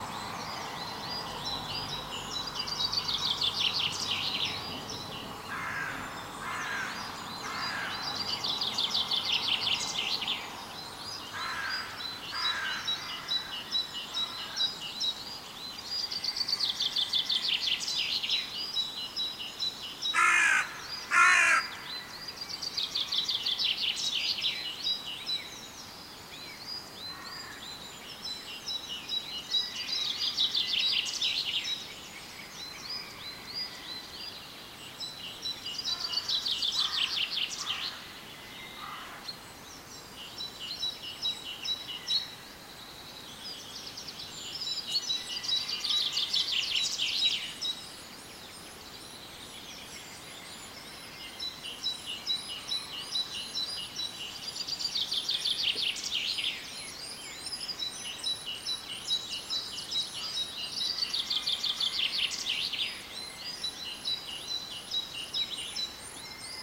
Dawn Chorus Scotland
A recording of the dawn chorus on an Olympus LS-5 digital audio recorder. Recording made on a small camp site in Arrochar Argyll 01/05/13.
birdsong, dawn-chorus, scotland